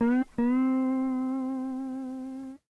Lo-fi tape samples at your disposal.